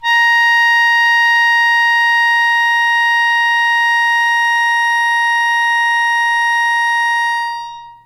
a multisampled hohner melodica. being too lazy, not every key has been sampled, but four samples/octave should do it...
acoustic, instrument, melodica